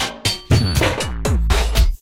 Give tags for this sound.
120BPM
drumloop
rhythmic

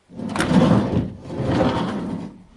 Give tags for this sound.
Bang
Boom
Crash
Friction
Hit
Impact
Metal
Plastic
Smash
Steel
Tool
Tools

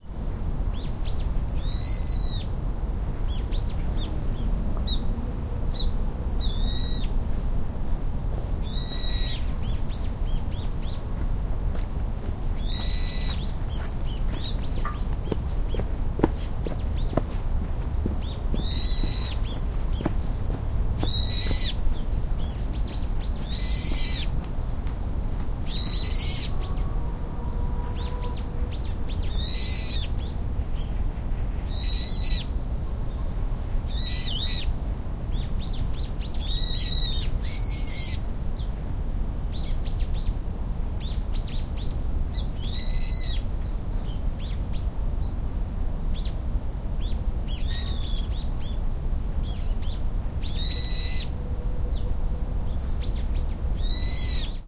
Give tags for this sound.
seoul
footsteps
korea
field-recording
birds

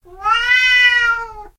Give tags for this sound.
pet
cats
meow
animals
animal
domestic
cat
pets

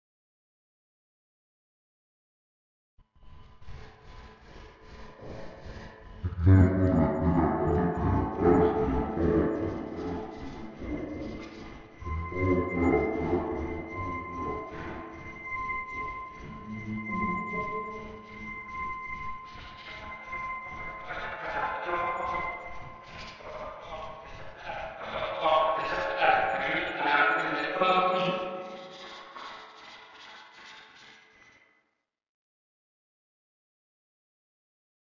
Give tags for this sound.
ambience
voice

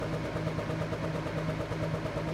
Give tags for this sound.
Machine
Fan

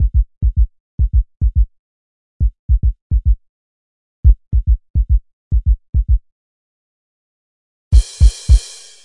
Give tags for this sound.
on-rd
On-Road

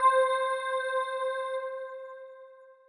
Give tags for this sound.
additive
lead
pad
synth
vocal
bell

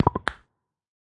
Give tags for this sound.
snap
crack
pop
bones
crunch

